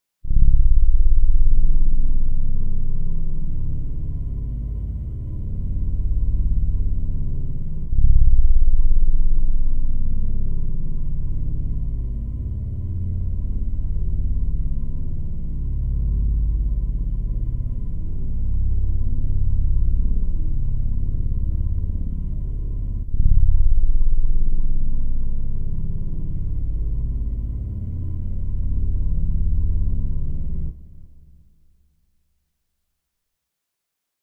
LOW DRONE 004
background, ambience, drone